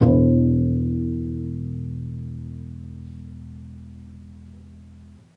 flo x regis cb E1
E1 on my friend Regis Nesti's contrabass, recorded with an iphone.
contrabass, contrebasse, doublebass, iphone-recording, upright-bass